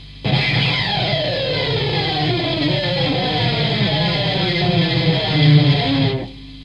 guitar pick scraping
pick, scraping, guitar